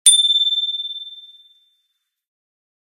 bicycle-bell 03
Just a sample pack of 3-4 different high-pitch bicycle bells being rung.
ringing, bicycle, ping, bells, ting, chime, metallic, ring, ding, glock, chimes, clang, bright, percussion, high-pitched, contact, bike, glockenspiel, bell, strike, hit, metal